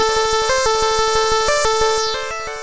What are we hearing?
Ok, I am trying to make an epic 91 bpm neo classical instrumental and needed galloping synth triplets. This is what I got. Some were made with careless mistakes like the swing function turned up on the drum machine and the tempo was set to 89 on a few of the synth loops. This should result in a slight humanization and organic flavor. Pitch and timbre are indicated in file name and tags. The loops are meant to be 3/4 time but I found that pasting them into a 120 bpm seqencer of 4/4 tempo results in perfect triplet timing... so the drums might be useless for this project. If pasting into DAW at 91 bpm, overlap or cut off the silence or delay trail as applicable.
loop, 91, synth, bpm